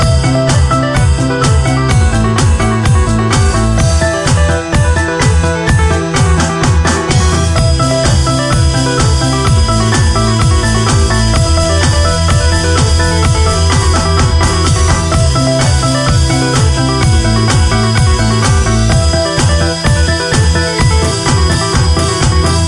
Loop EndlessPossibilities 09
A music loop to be used in storydriven and reflective games with puzzle and philosophical elements.
Philosophical, Puzzle, Thoughtful, game, gamedev, gamedeveloping, games, gaming, indiedev, indiegamedev, loop, music, music-loop, sfx, video-game, videogame, videogames